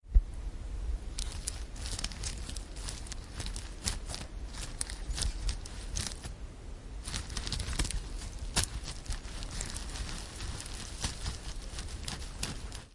This sound is produced when manipulating a hat with electromagnetic sensors which is used to measure the brain activity. This hat is from CBC (UPF) for the brain cognition experiments.
brain, cbc, cognition, electromagnetic, hat, upf